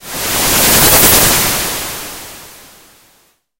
Attack Zound-156
A long clap or snare like noise burst with some LFO on it at the end. This sound was created using the Waldorf Attack VSTi within Cubase SX.
soundeffect, electronic